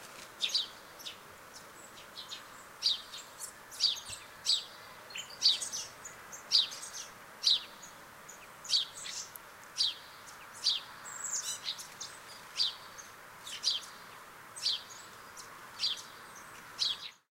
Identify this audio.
Two birds in action.
Equipment used
And I recorded it with my
Recorder Zoom H4n pro
Microphone Sennheiser shotgun MKE 600
Triton Audio FetHead
Rycote Classic-softie windscreen
Wavelab
whistling, fethead, zoom, chirp, bird, chirps, birds, cm3, line-audio, h4n, field-recording, tweet, nature, garden, birdsong, whistle, bushes